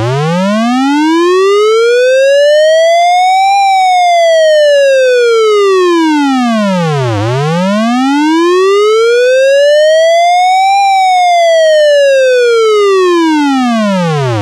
Siren effect made with PC Speaker beeps. (From 99 sq.hz to 800sq.hz (1 step) and vice versa.